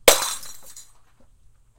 Bottle Smash FF167
1 quick beer bottle smash, hammer, liquid-filled